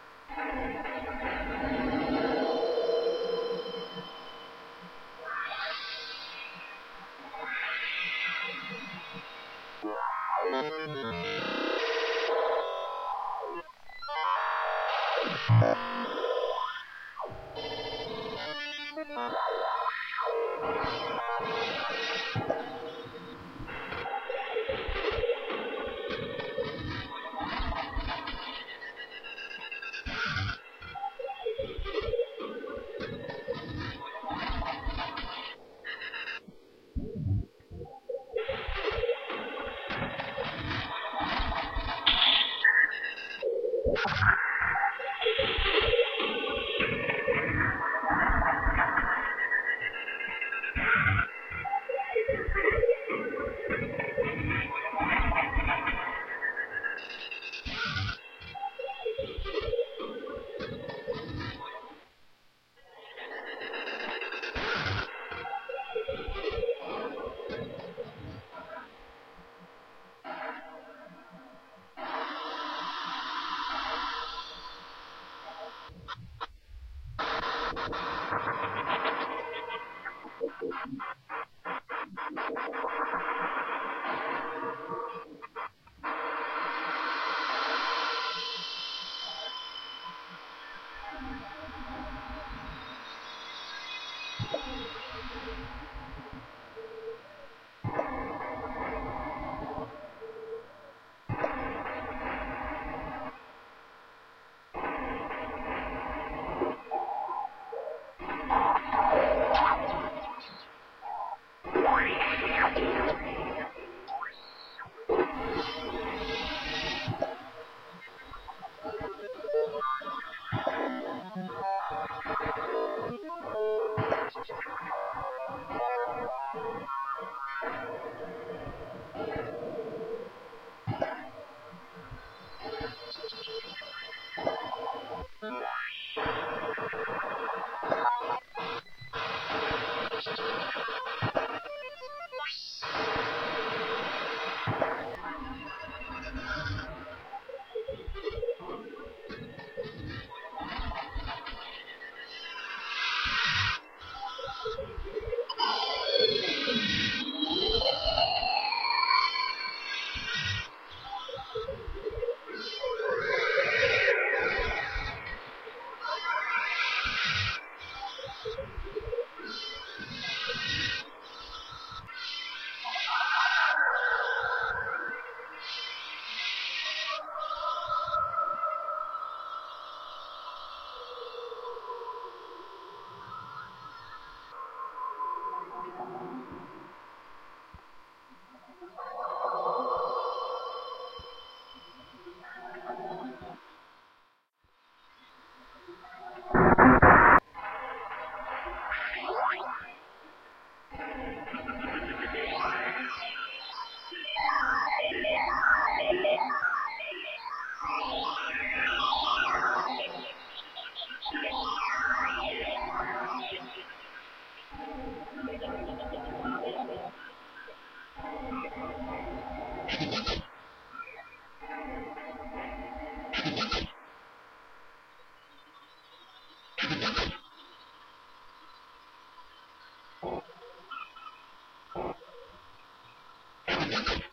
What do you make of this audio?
Right, so the Kaoss-pad is out of the drawer, out of it's box and with new batteries... and it is desperate for some action.
I took the previous Continuum-1 file from thatjeffcarter:
I loaded it up to Audacity and cut up what I thought were the 'best bits'. i loaded these clips on to Ableton Live into individual clip slots, spred over 3 channels. I configured a 4th channel so that it would take sound input from "ext in" and record it (but not output any sound, i.e., monitoring off).
Finnaly, I plugged the Kaoss Pad input to my laptop soundcard output and the Kaoss Pad outpur back into the soundcard input. I connected headphones to the Kaoss Pad so I could monitor what I was doing.
Then it was a matter of triggering clips in an almost random way, giving the Kaoss Pad selector button a twist and applying an effect for a bit while fiddling with the XY pad. Then switch to a different effect... and so on.
Mostly I applied filters, granular and looping effects.